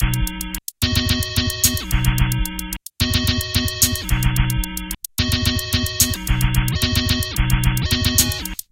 8bit110bpm-59
The 8 Bit Gamer collection is a fun chip tune like collection of comptuer generated sound organized into loops
com, loop, 8bit, 110, bit, 8, bpm